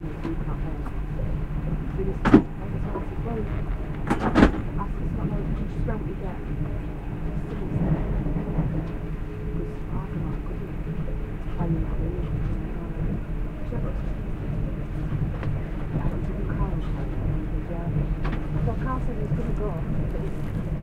2 08 train compartment 3f
General internal ambience of a train compartment with voices, the sound of the train on the tracks. Doncaster to London train. Minidisc recording.